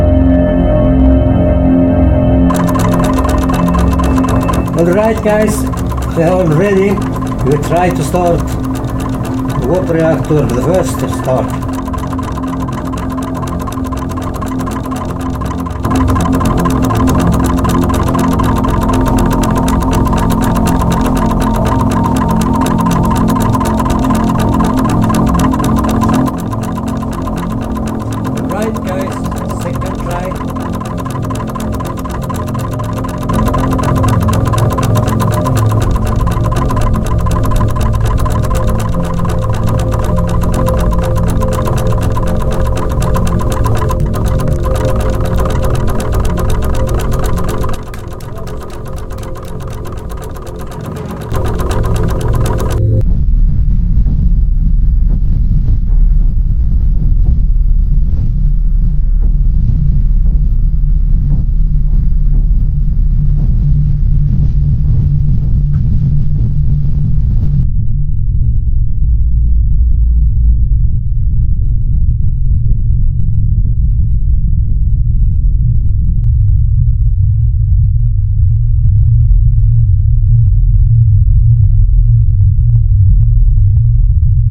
Warp speed cannot deliver enough energi.Capten Kirk is upset, but he relies on the skil that has prina nacine engineer Scott. The Warp genedator also charges one of the photon guns.Infact I bought a full set of blueprintss for the space Craft . Bought 20 A2 drawings for a lot of Money.
Star, Trek, SPACE, Enterprise